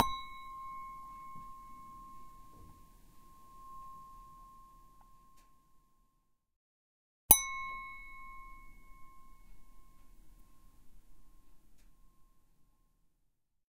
Sound produced with a glass.